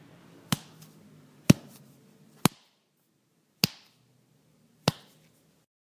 Great Punch
punch great best
best, punch, great